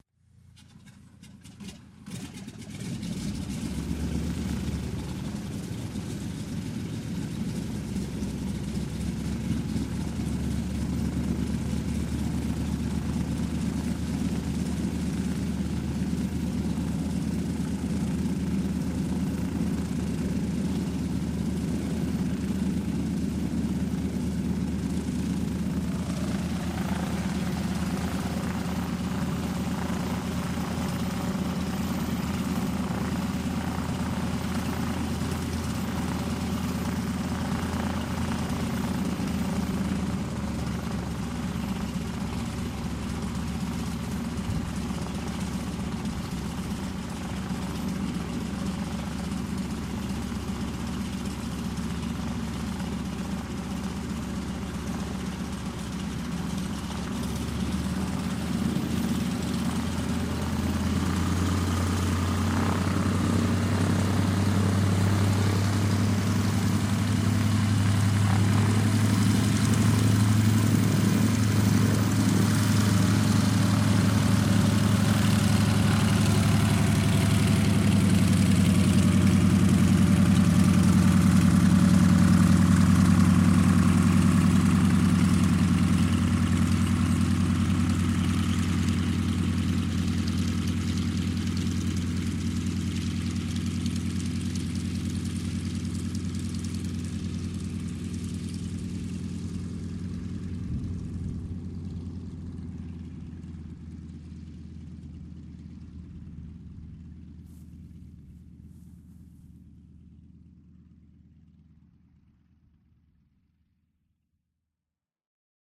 Recorded by ZOOM H4 in summer 2011 (Radawiec)